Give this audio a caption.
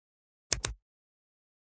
finger-snap-stereo-04
10.24.16: A natural-sounding stereo composition a snap with two hands. Part of my 'snaps' pack.
bone, brittle, click, crack, crunch, finger, fingers, fingersnap, hand, hands, natural, percussion, pop, snap, snapping, snaps, tap